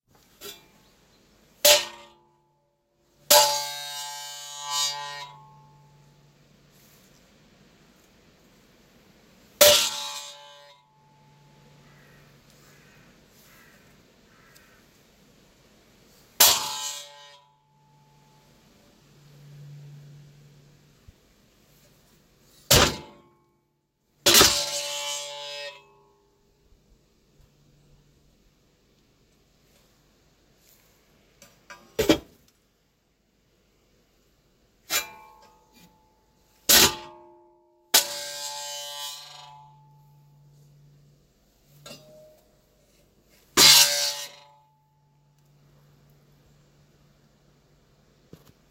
Steel-plate-bangs-outsidewithbirds
Knocked these two steel plates together by accident one day and was really take with the sound they made. Had to whip out my portable recorder and grab them. feel like the birds in the background add gravitas, or perhaps they were mocking me.
Live long and listen
buzz, Steel-plate, bang